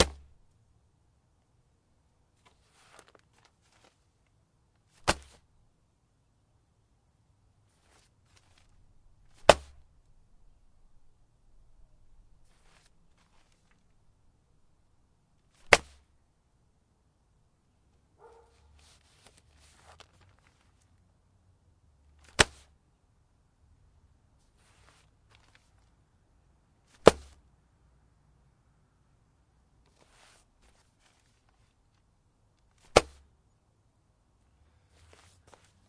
newspapers large hard
forcefully throwing a large package of newspapers onto a porch
hard, large, newspaper, toss